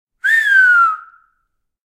Whistle, Finger, Medium, A
I was doing some recording in a large church with some natural reverberation and decided to try some whistling with 2 fingers in my mouth. This is one of the medium whistles.
An example of how you might credit is by putting this in the description/credits:
The sound was recorded using a "Zoom H6 (XY) recorder" on 22nd March 2018.
finger, medium, mouth, whistle, whistling